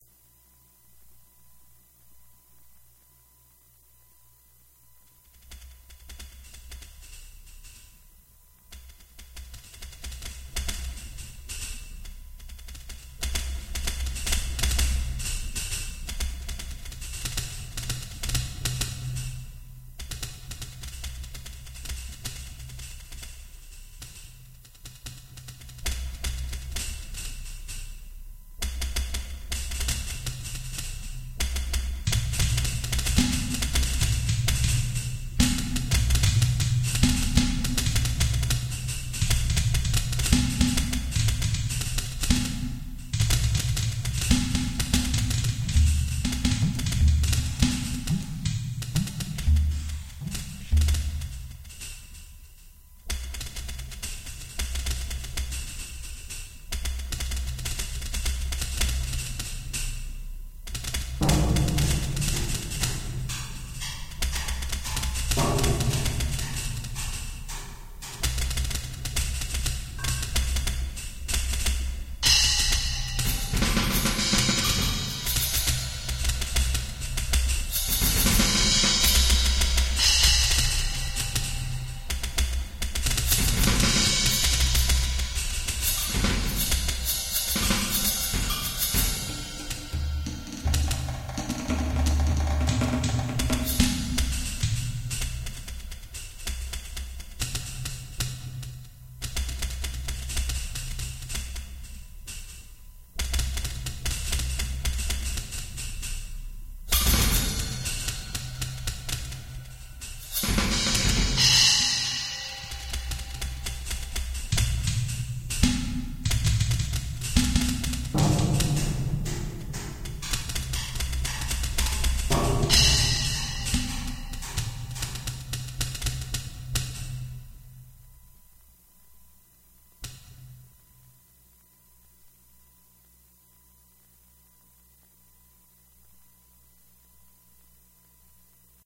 percosis Mixdown
percussion on various drums from around the world
groovy, improvised, percs, percussion, percussive